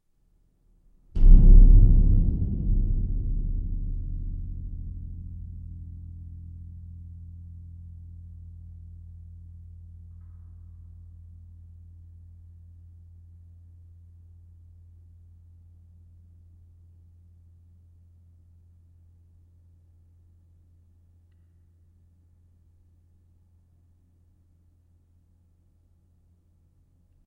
Horror stinger, great for a big reveal or revelation